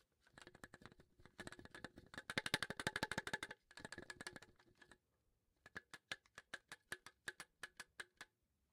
Shaking Tape Dispenser
Shaking a Scotch Tape Dispenser.
click, shake, tape, rattle